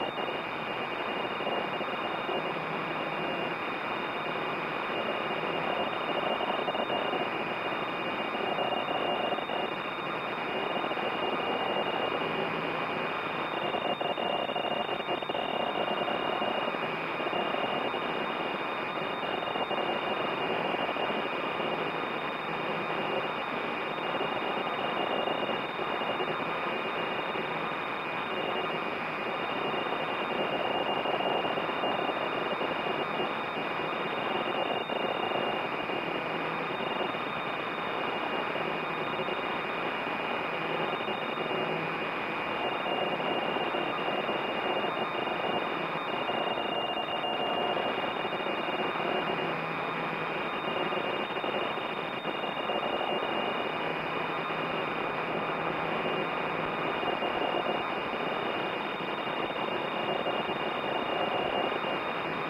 Various recordings of different data transmissions over shortwave or HF radio frequencies.
radio, shortwave